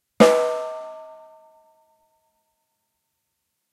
samples in this pack are "percussion"-hits i recorded in a free session, recorded with the built-in mic of the powerbook
drums, snare, unprocessed